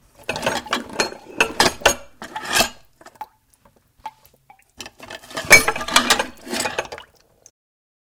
Dishes clanging and banging